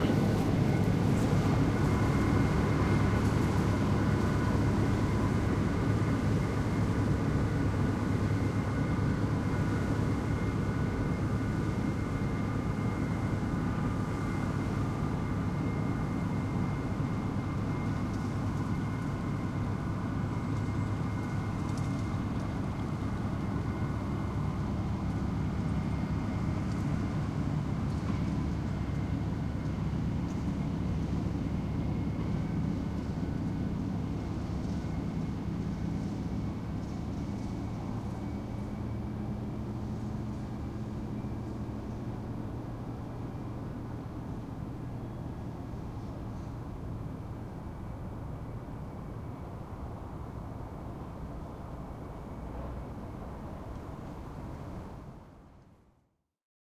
Tractor in Field
A tractor in a nearby field, somewhere outside of Scarborough.- Recorded with my Zoom H2 -
farm, machinery, motor, tractor, vehicle